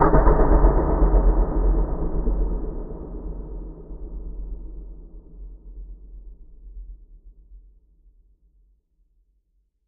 ogun-widewhizz
sound percussion made with virtual synthesiser